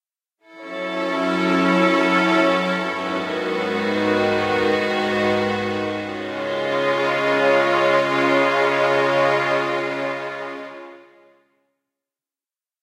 made with vst instruments